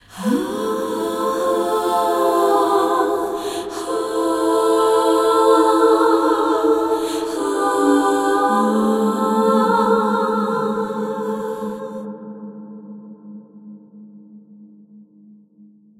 Harmonizing vocal tracks, no lyrics. A bit of compression, reverb and chorus added, EQ adjusted. The clip preview might have squeaks, but the download is high quality and squeak free (or shall I say "squeaky clean"?).
Recorded using Ardour with the UA4FX interface and the the t.bone sct 2000 mic.
You are welcome to use them in any project (music, video, art, interpretive dance, etc.). If you would like me to hear it as well, send me a link in a PM.
BPM 100